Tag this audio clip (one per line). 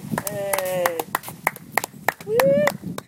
applause
cheer
clap
cheering
small-crowd
crowd
polite
clapping
applaud